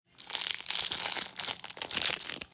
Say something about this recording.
A crunching sound.